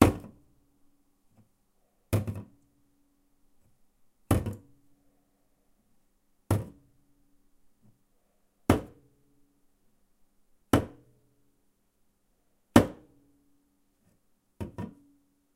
Large Monster Energy Drink Can Being Set on Countertop (8x)
Multiple takes of a Large Monster Energy drink can being set onto a kitchen countertop, then being ever so quietly removed. This is good used in cinematics and audio dramas and theater.
energy-drink, Large, Monster, soda